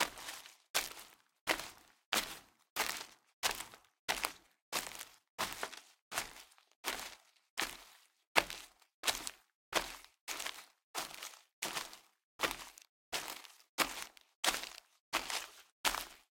Footsteps Leaves+Dirt 2
Boots, Dirt, effect, Foley, Footstep, Footsteps, Grass, Ground, Leather, Microphone, NTG4, Paper, Path, Pathway, Rode, Rubber, Run, Running, Shoes, sound, Stroll, Strolling, Studio, Styrofoam, Tape, Walk, Walking